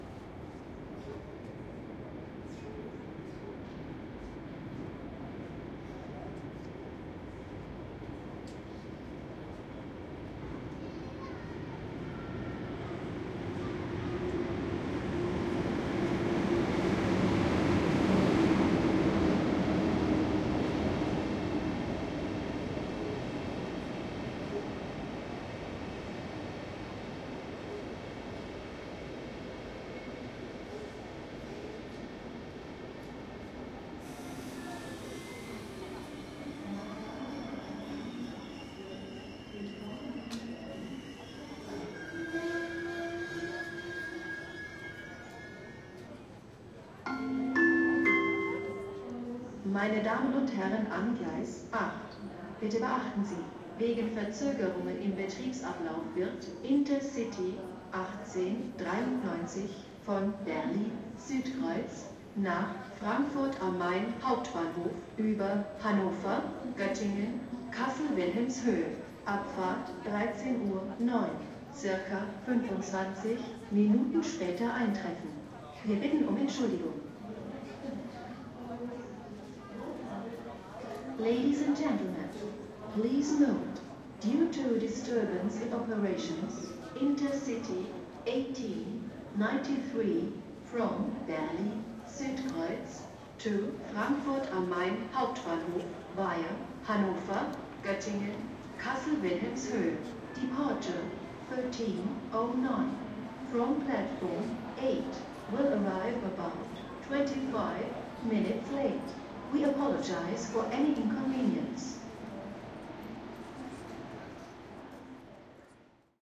Arriving and departing trains and announcements at Berlin main station, lower deck. Zoom H2